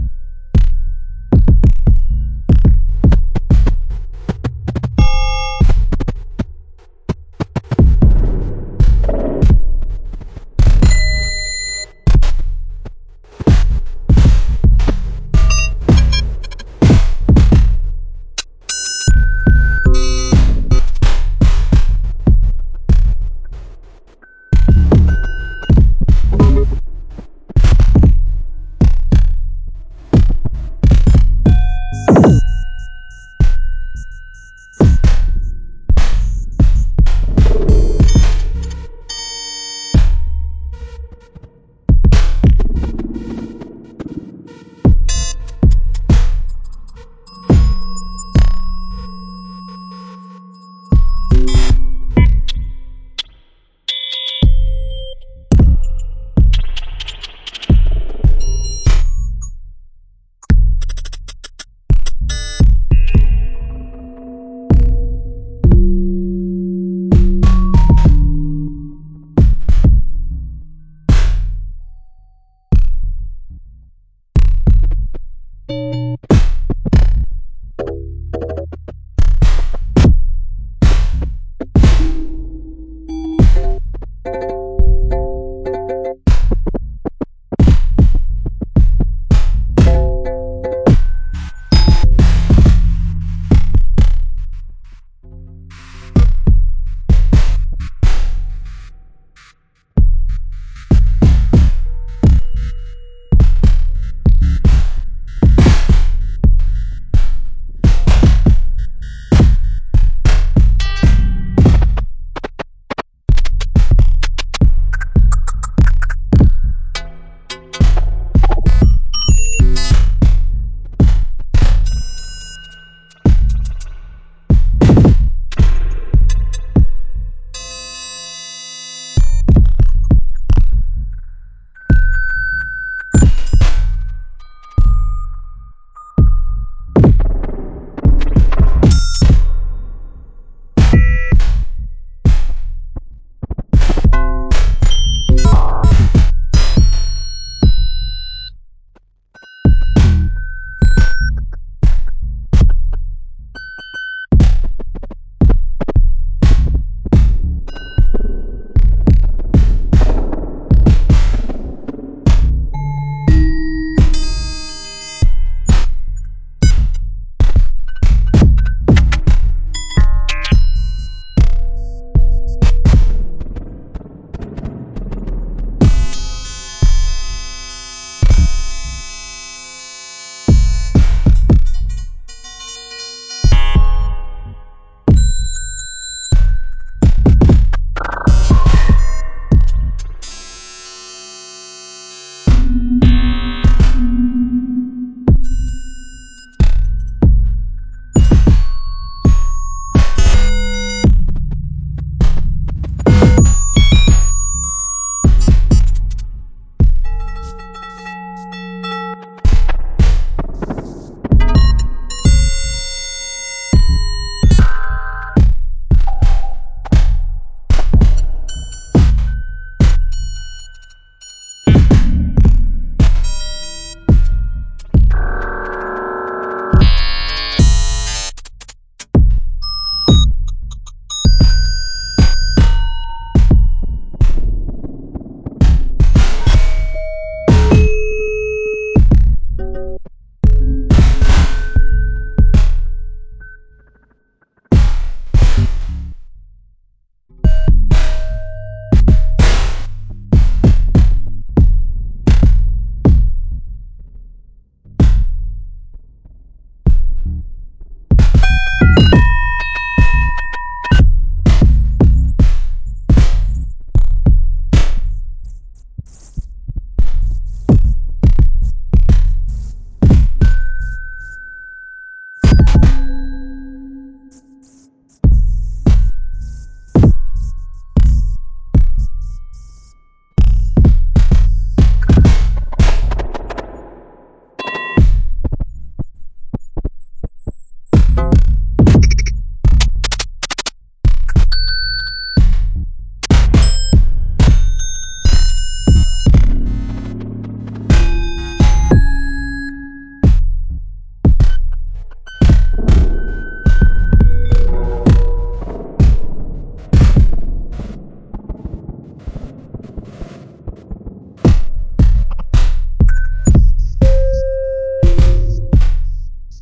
datch-835098cd-9ab1-4f44-bfb5-97f8766e16a3
A sample made with VCV Rack.
noise, drum, weird, synthesizer, digital, glitch, synth, loop, experimental, electronic